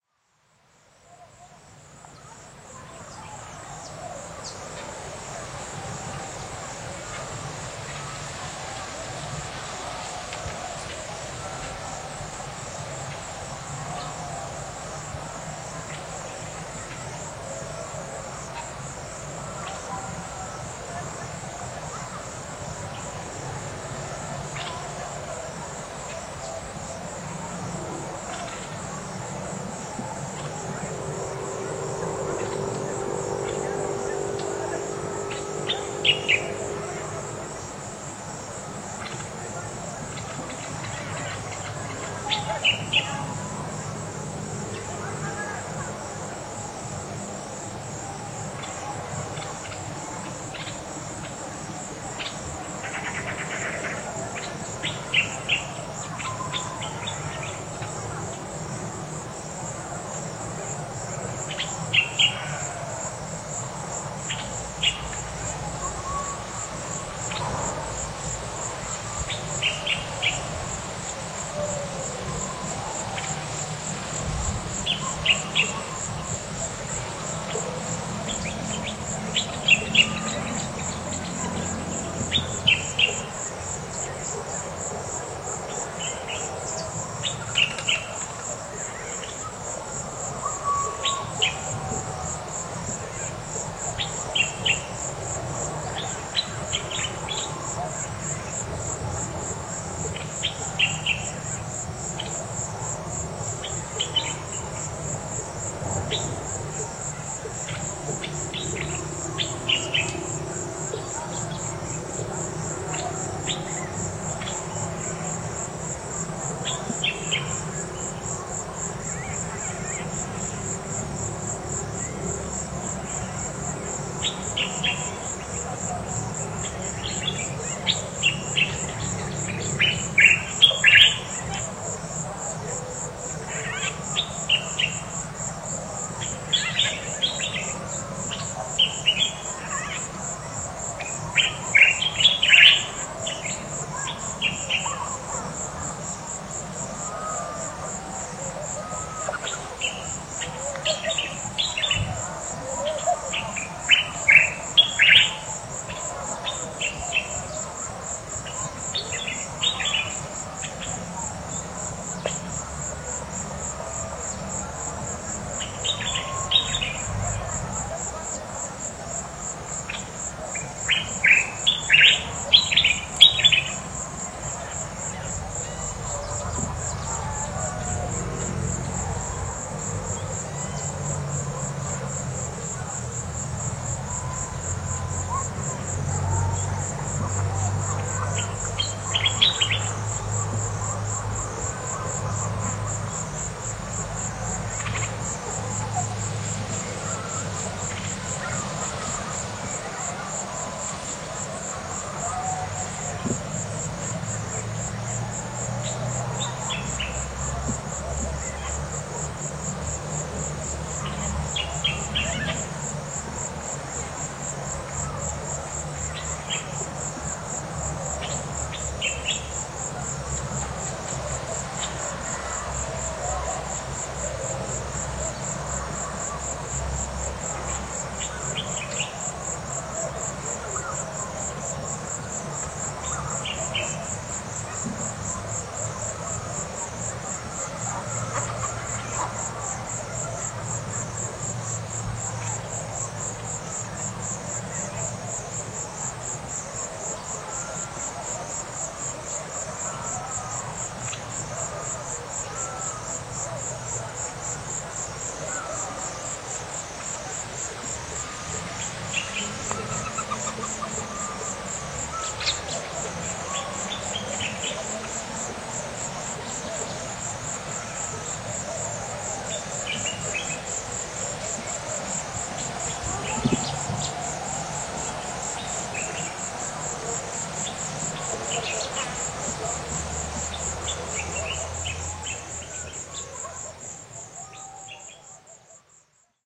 Calm atmosphere small forest Senegal
Calm atmosphere in the small forest of Senegal.
At the edge of the Senegal River just in front of Mauritania calm atmosphere of a small forest with birds, crickets, motorcycles and cars in the distance.
Recorded with on a ZOOM H6 + Mid side mic and a Sennheiser MD21U mic too.
africa, ambience, animals, calm